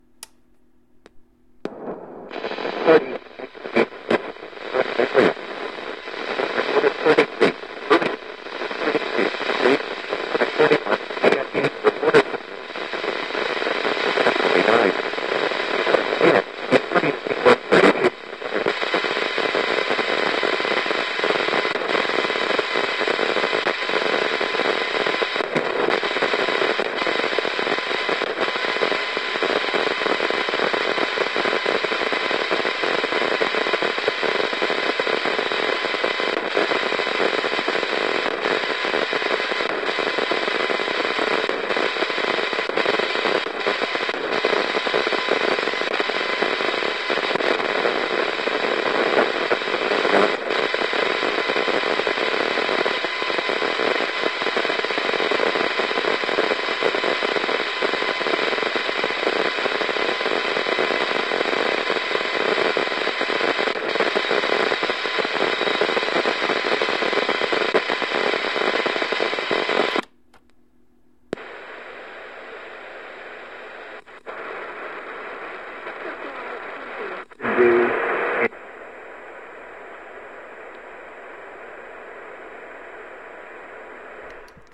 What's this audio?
I recorded a radio that was on no particular station hence the static :)